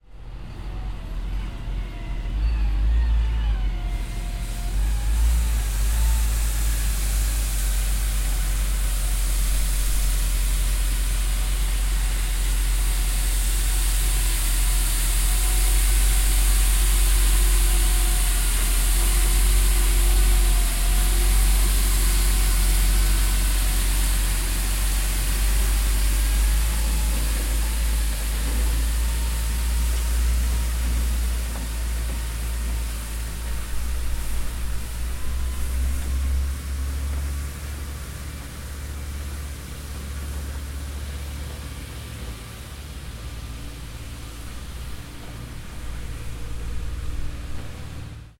Broom Bear Street Cleaner Brushes Aproach Idle Drive Away
Broom Bear Street Cleaner Approaches, idles in front of mic, drives away into distance